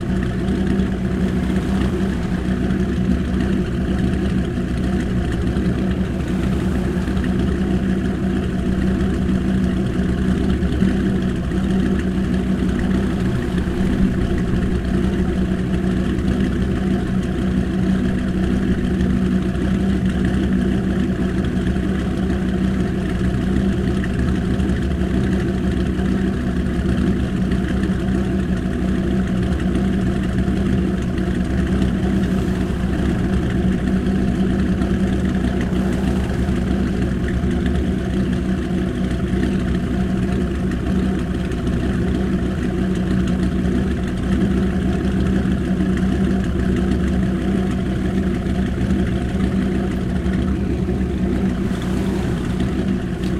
Sport car rumble. Sound of car engine. I don't know car model but it's very cool red car.
Recorded 09-04-2013.
XY-stereo, Tascam DR-40, deadcat
rumble, street, town, Russia, field-recording, noise, fuel, cars, car, sport-car, Omsk, city